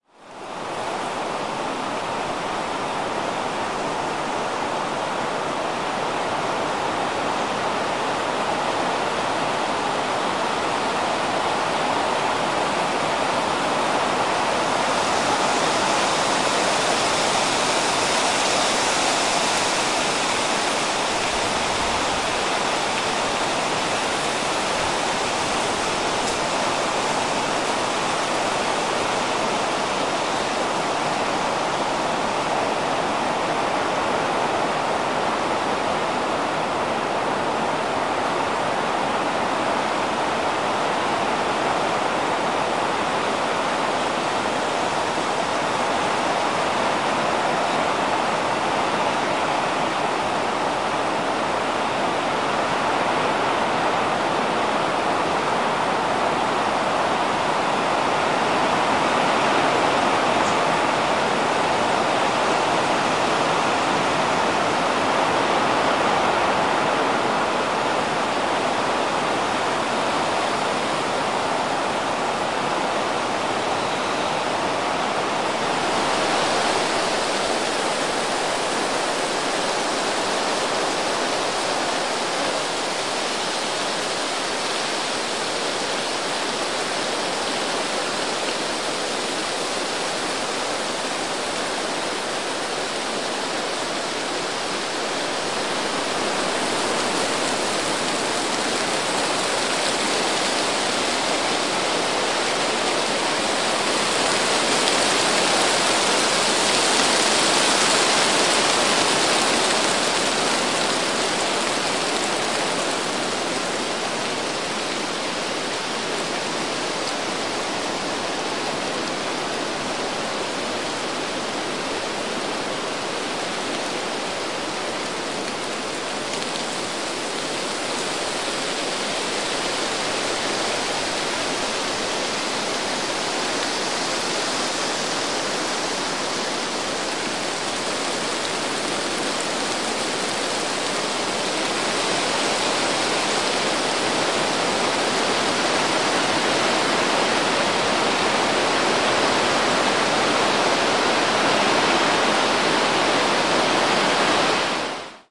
Wind blowing through cottonwood trees on a hot June day in a canyon in Grand Staircase/Escalante National Monument, Utah. This recording is a combination of three recordings I made with a Tascam DR-40. I cut out any mic noise and removed some of the lower frequencies to reduce mic noise. You can hear the leaves clattering together pretty well, and no cars or airplane noise.